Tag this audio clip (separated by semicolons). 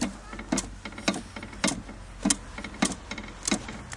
Germany; Essen; SonicSnaps; School